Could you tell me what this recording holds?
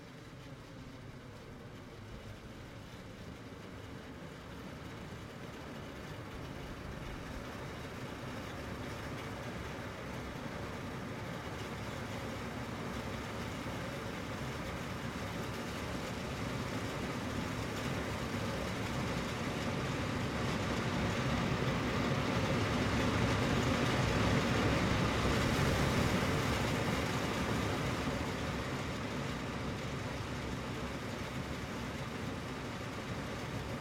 Industrial Heating Vent